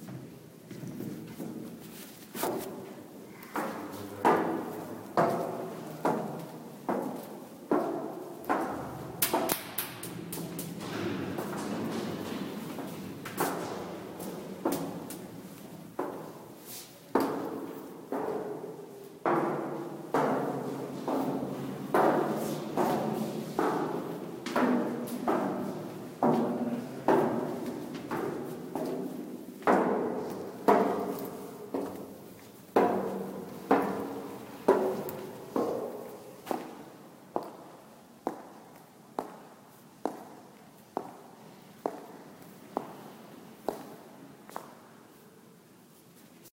Gormley model sculpture
The sound of footsteps while walking inside Anthony Gormley's metal sculpture at the White Cube gallery in London, with the last few seconds recorded while walking on the concrete floor of the galley after coming out of the sculpture. The sound echoes inside the metal sculpture and sound from other people can be heard at the beginning.
echoe, metal, footsteps